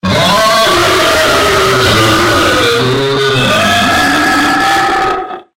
Demonic Roar
Scream, Roar, demostration, hell, free, sound, Games, Rpg, Game, test, scary, Demon